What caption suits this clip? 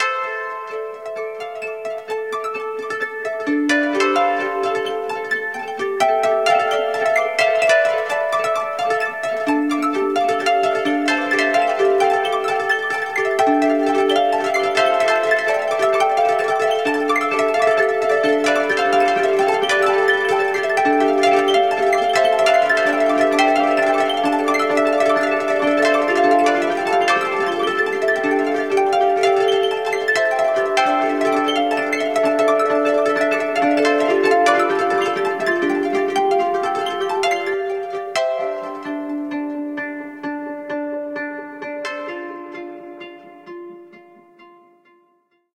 Wilder Wind Chimes
The sound of wind chimes or breaking glass. 130 BPM. Made with two of HBSamples loops from:
atmos, ambient, wind-chimes, electronic, ambience, background, atmosphere, background-sound, soundscape, atmo, 130bpm, windchimes